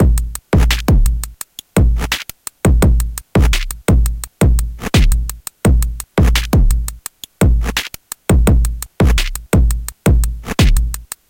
85bpm fx A+B Pattern
85bpm, beat, cheap, distortion, drum, drum-loop, drums, engineering, loop, machine, Monday, mxr, operator, percussion-loop, PO-12, pocket, rhythm, teenage